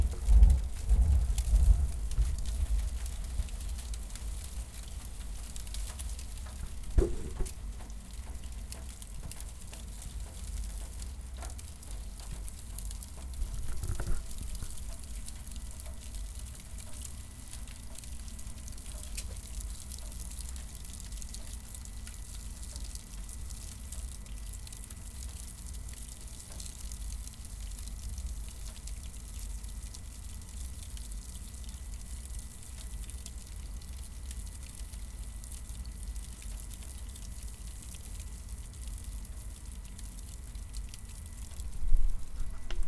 Cardboard burning 2
burn, burning, cardboard, fire, flame, match